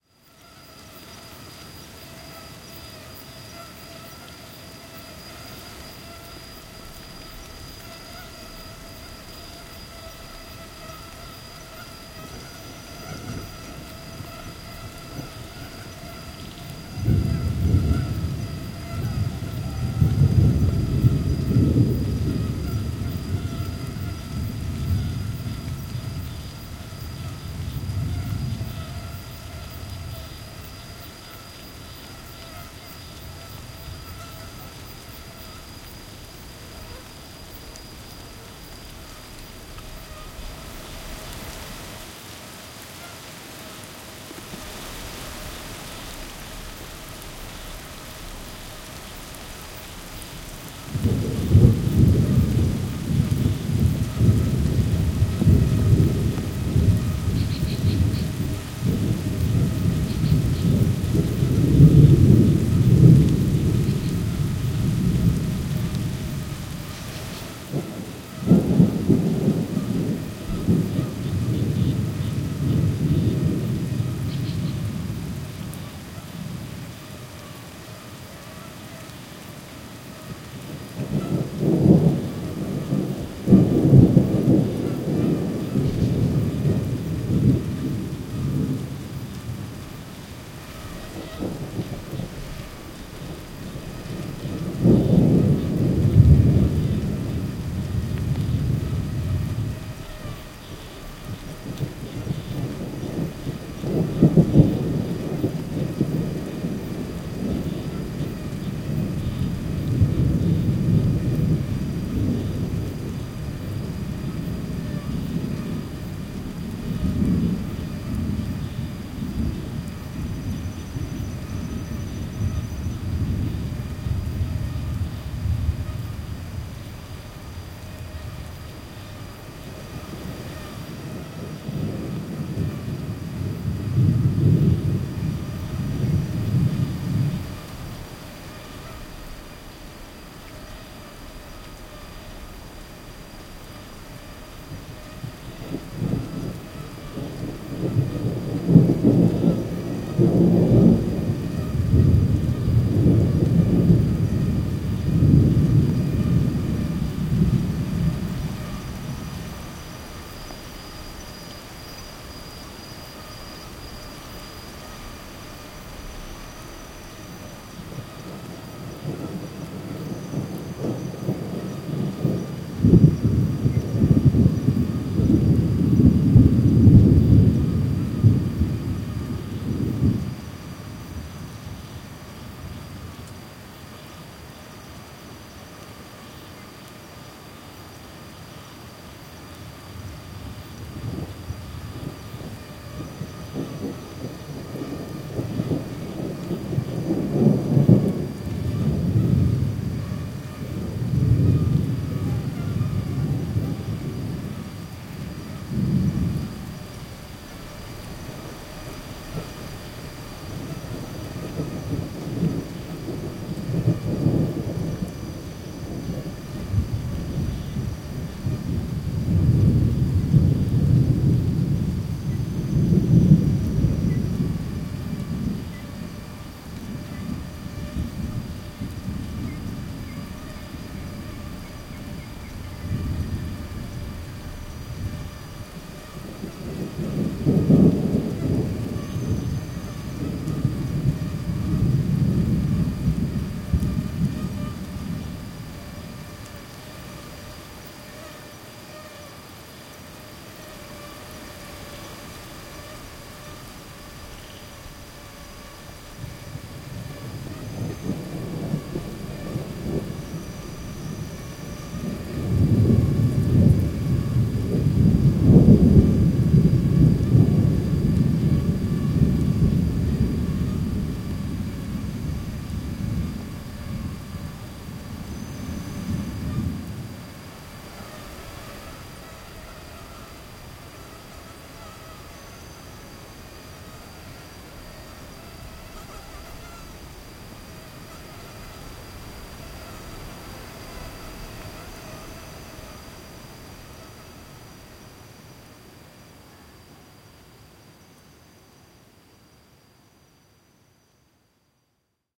Evening in the interior of Minas Gerais, Brazil.
Mosquitoes and light rain with thunderstorms.
belo-horizonte, brasil, brazil, cachoeiras, countryside, field-recording, minas-gerais, Mosquitoes, nature, rain, rio-acima, rural, tangara, thunderstorm